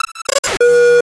Glitch sound.
This pack of sounds and transitions is made using the software "Ableton Live" and it is completely digital, without live recording. Exceptionally sound design. Made in early autumn of 2017. It is ideal for any video and motion design work. I made it as a sign of respect for my friends working with Videohive.
noise, distortion, glitch